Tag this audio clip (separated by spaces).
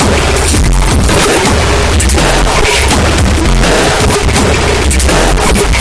bit break broken crushed distorted metal